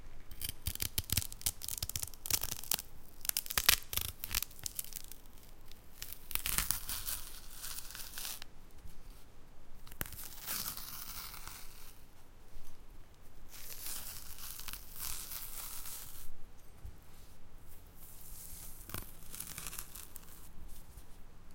The process of peeling a banana. First with a knife cutting the top and then, slowly, peeling the different sides. Recorded from a close distance with a Sony PCM-D50.